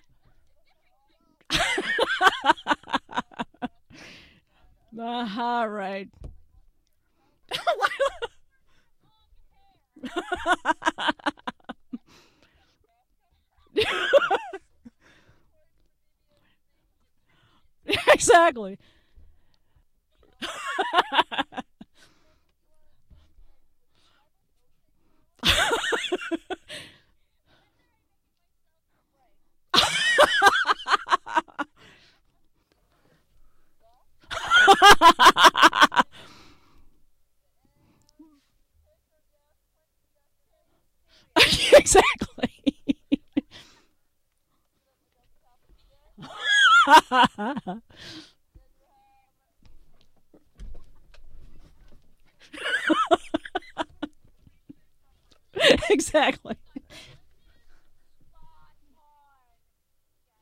072810 laughter woman
Mono recording of a woman laughing, sincerely.
female, laugh, laughing, laughter, woman